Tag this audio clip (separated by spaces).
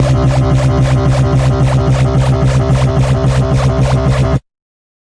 110 bass beat bpm club dance dub dub-step dubstep effect electro electronic lfo loop noise porn-core processed rave Skrillex sound sub synth synthesizer techno trance wah wobble wub